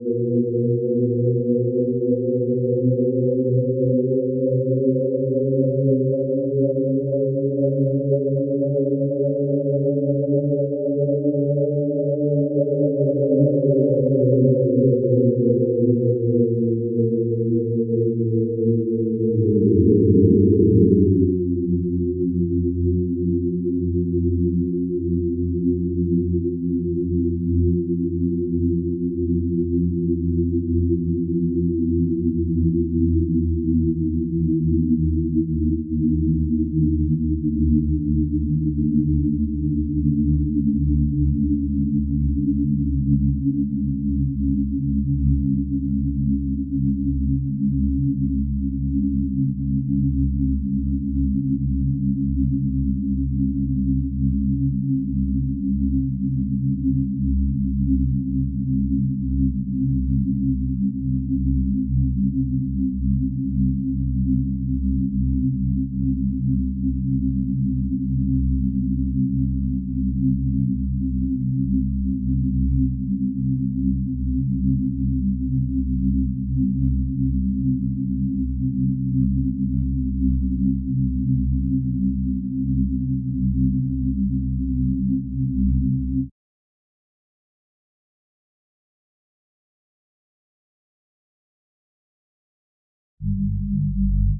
sound literally drawn, in the application Phonogramme, by Vincent LeBros
fft, metasynthesis, spooky